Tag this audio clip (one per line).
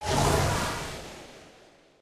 build
Magic
shield
spell
up
whoosh